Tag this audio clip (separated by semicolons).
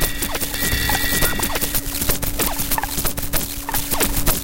digital; glitch; random